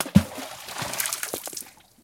Tossing rocks into a high mountain lake.
bloop, percussion, splash, splashing, water